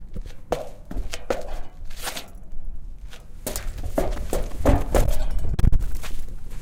FX Footsteps Metal 01
feet, foley, foot, footstep, footsteps, shoe, step, steps, walk, walking